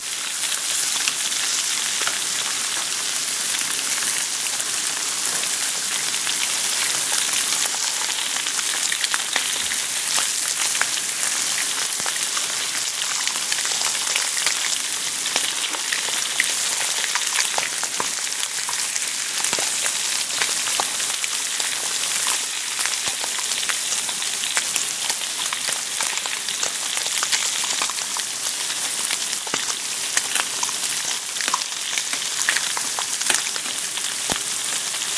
Big Frying Loop
A mix of multiple frying recordings from my Food and Drink pack. Edited with Audacity.
burger, cook, food, fry, frying, pop, sizzling